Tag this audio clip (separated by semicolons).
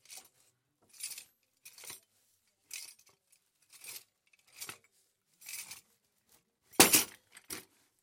box; case; clang; manipulation; metal; metallic